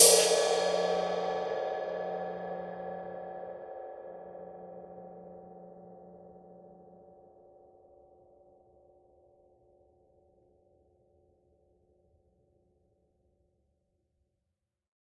Single hit on an old Zildjian crash cymbal, recorded with a stereo pair of AKG C414 XLII's.
dry, zildjian, cymbals, splash, drums, percussion, cymbal, quality, crash, clean